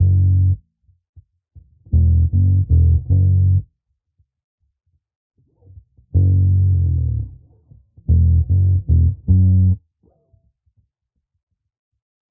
13 bass dL
Modern Roots Reggae 13 078 Gbmin Samples